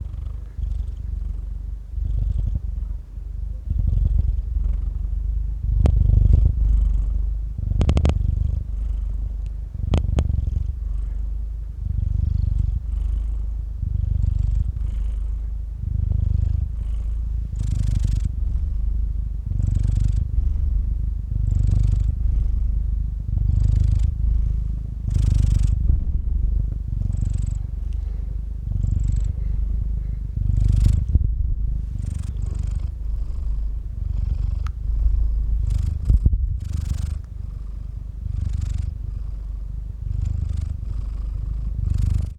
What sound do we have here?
cat purr
noise, cat, rumble, domestic, closeup, purr, feline, home, bassy, recording, room, purring, animal